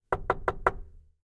Wood Knocks 3
Knocking on wood. Recorded in Stereo (XY) with Rode NT4 in Zoom H4.
block, door, knocking, knocks, plank, rolling, square, wood, woodblock